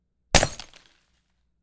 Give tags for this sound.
breaking; glass

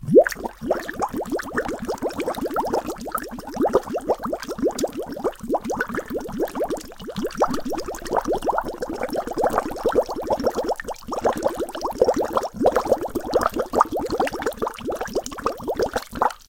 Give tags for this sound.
bubble; bubbly; bubbles; drink; water; bubbling

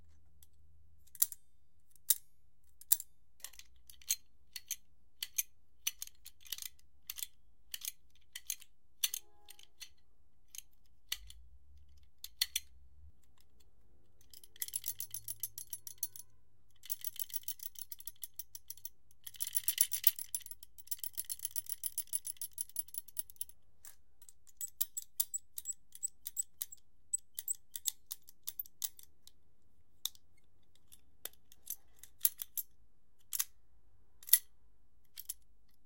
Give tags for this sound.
clippers; construction; nail; tool

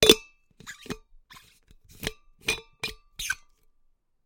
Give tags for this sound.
close; drink; metalic; open; screw

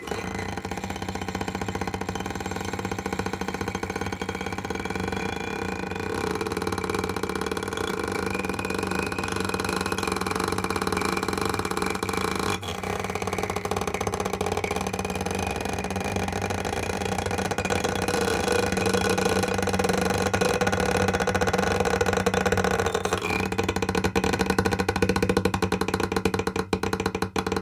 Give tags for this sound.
pickup; unprocessed

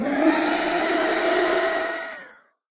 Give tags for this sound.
creature; dragon; growl; large

